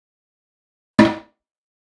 toilet seat falling
falling, toilet